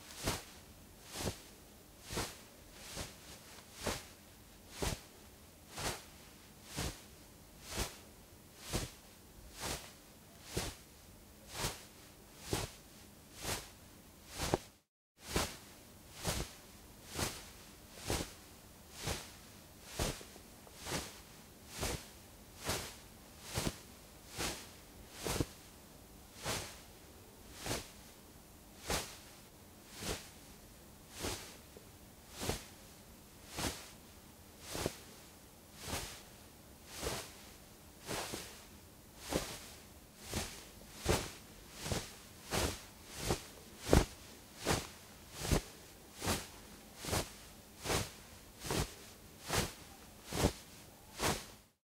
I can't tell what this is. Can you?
Cloth Rustle 7
Movement, Foley, Cloth, Rustle